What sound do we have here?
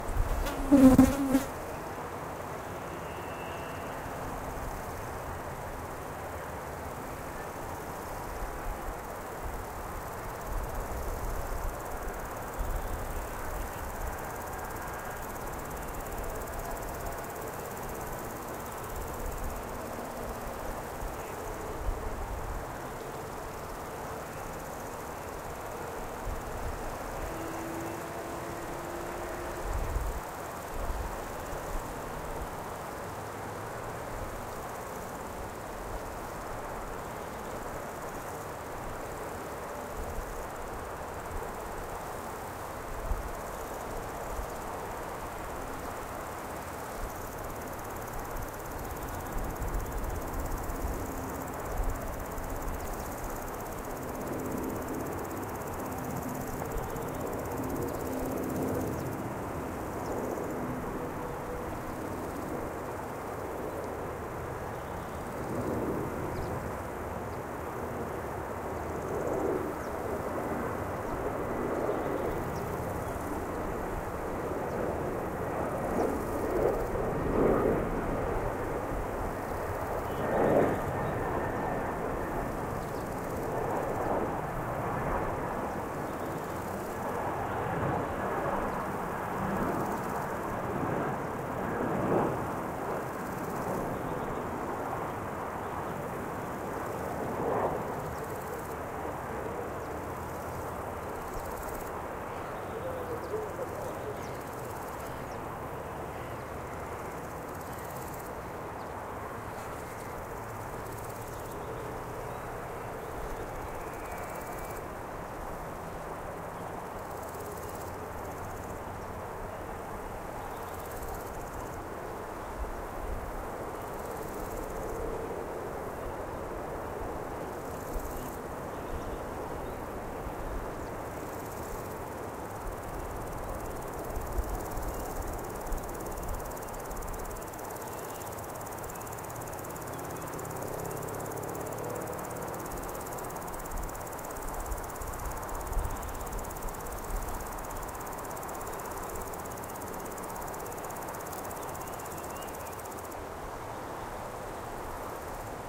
Athmosphere in the Victory park, Russia, Omsk. Deep in the park, forest edges. Hear birds, chirping and hum of insects. Weak noise of cars from highway. In distance sound of plane making landing.
Clip start with loud sound hum of fly.
XY-stereo.